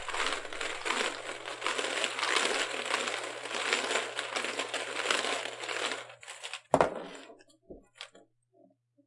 This is a sound I created that is meant to replicate the sound of a bingo spinner spinning bingo balls. At the end, a ball drops and rolls an inch or so. This sound was made using 3D printed balls and two colanders.
Balls, Bingo, Rolling